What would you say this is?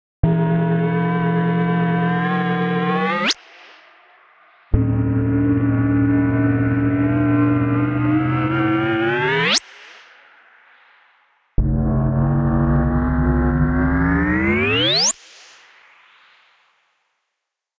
warpdrive-short-edit
The result of a preset made a long time ago in Logic Pro's Sculpture PM Synth. This pack contains a few varied samples of the preset.
build, drive, star, gun, long, laser, spaceship, space, sci-fi, hyper, synth, warp, pitch, ship, cruiser